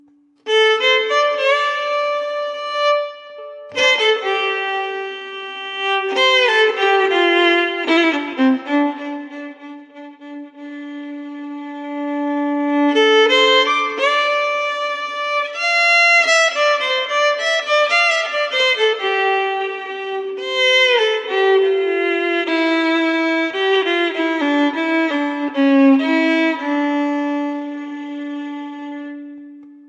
This sad bluesy violin lick captures the essence of heartbreak and melancholy in its soulful melody. It evokes a feeling of longing and sorrow that can resonate with listeners who have experienced deep emotional pain. Whether you're a musician looking to incorporate a soulful touch into your work or just someone who appreciates the power of music to convey raw emotions, this sad bluesy violin lick is sure to move you.
acoustic, Compose, Contemplate, cry, Desolate, Emotional, Evocative, fiddle, film, Haunting, Melancholy, Melodic, meloncholy, Mournful, Nostalgic, Plaintive, Poignant, Reflective, Relax, Remix, sad, Sample, solo, Soulful, string, strings, violin, Wistful, Yearning